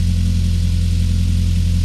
Loopable clip featuring a Mercedes-Benz 190E-16V at approximately 500RPM at full engine load. Mic'd with an Audix D6 about 1 foot behind the exhaust outlet.
benz, engine, vroom, mercedes, vehicle, car, dynamometer, dyno